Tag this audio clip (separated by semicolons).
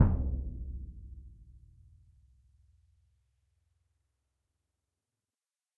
bass; concert; drum; orchestral; symphonic